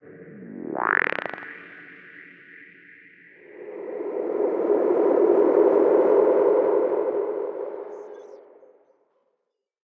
layered fx 1
delay, effect, psychedelic, fx